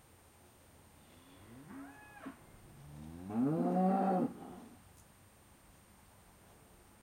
1191 two cows
Two cows on a pasture mooing.